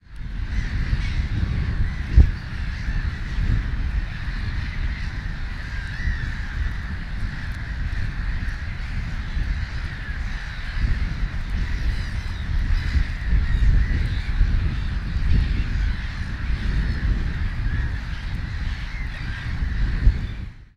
seagulls lake wind
lake, seagulls, wind